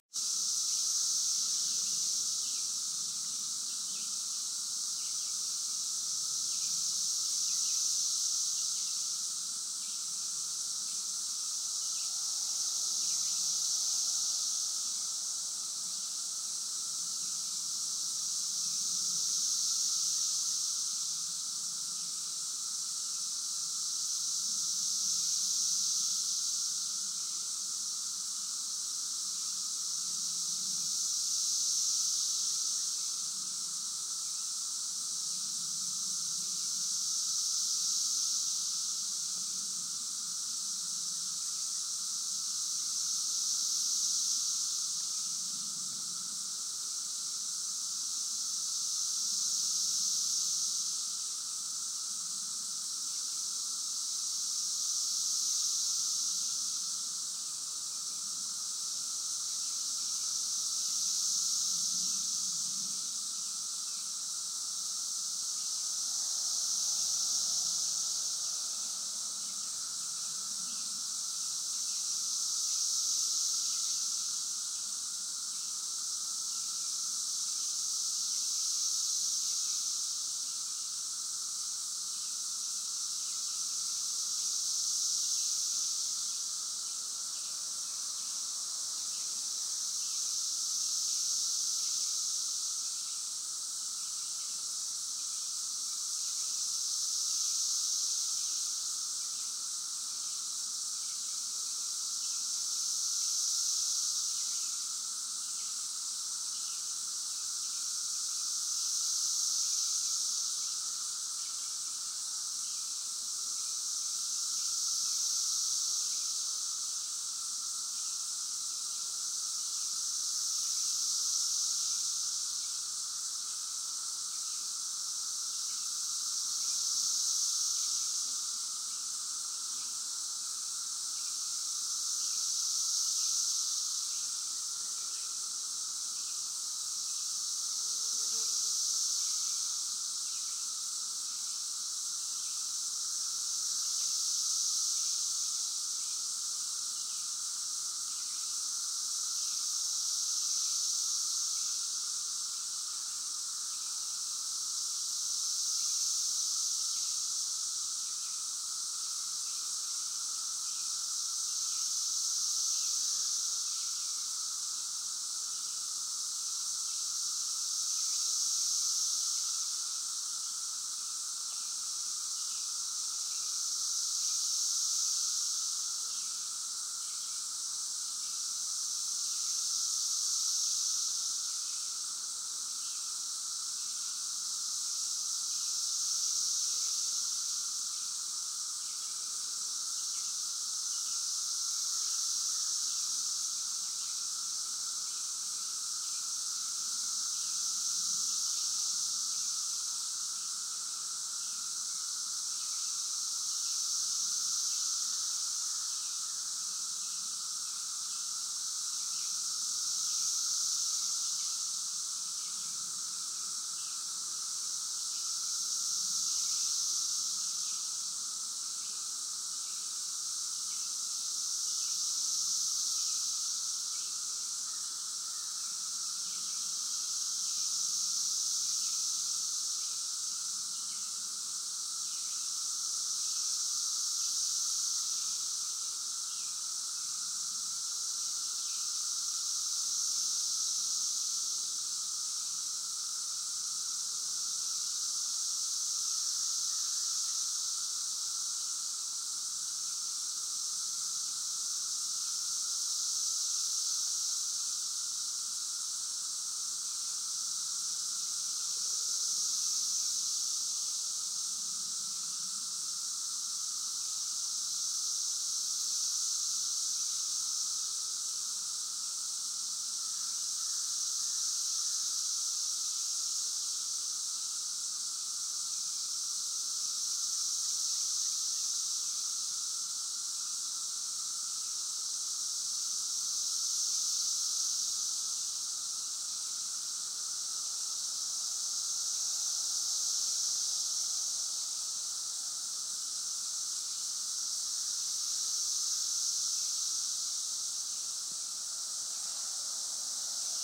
Cicadas at Clear Creek 2016-06-01 5:55 p.m.
2016-06-01
Clear Creek Metro Park
Rockbridge, Ohio
In late spring 2016, seventeen-year cicadas emerged in a section of the United States that includes eastern Ohio. The cicadas crawl out of the ground, shed their skins, and climb up into the trees. One cicada makes a loud and distinctive sound, but a whole group of them creates a dull roar.
This recording was made on the Cemetery Ridge trail at Clear Creek Metro Park in Rockbridge, Ohio. The audio includes both the distant roar of the cicadas and a close group in a nearby tree.
Sound recorded using the built-in mic on an iPhone 5.
17-year, Brood-V, cicada, cicadas, Clear-Creek, Clear-Creek-Metro-Park, Hocking-County, Hocking-Hills, magicicada, Ohio, Rockbridge-Ohio, song